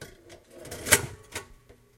Dull metal slide and snap
buzz, latch, machine, mechanical, whir